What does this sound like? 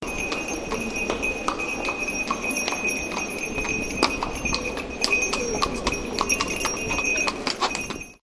Steps of a cart horse moving over cobbles, the horse making a slip near the end. Bells. Some wind noise on the microphone / un coche de caballos pasando sobre adoquines. Se oyen las campanillas del coche, y un pequeño resbalon del caballo al final.